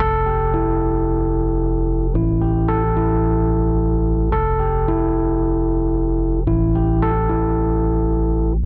A 4-bar, 111 beats per minute loop played on a Rhodes Piano. It was recorded a while ago, I think the signal chain is Rhodes to Carvin PB500 amp into Zoom H4 via line out.